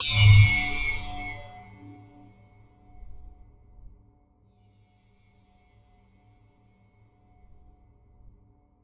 Synth Stab 1
This sound or sounds was created through the help of VST's, time shifting, parametric EQ, cutting, sampling, layering and many other methods of sound manipulation.
stab, abstract, electronic, synth, synthesizer, sfx, future, fx, digital, effect, soundeffect